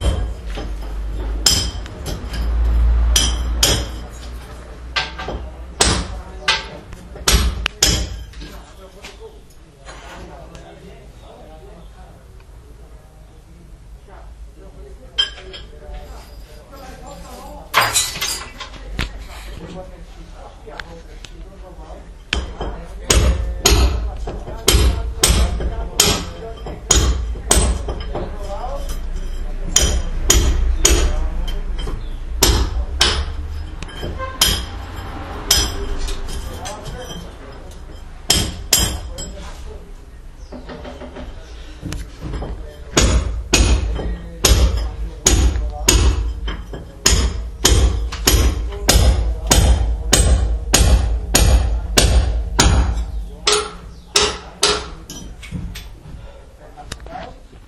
Cincel1WAV
A construccion worker hiting bricks with a chisel
bricks, chisel, construccion-worker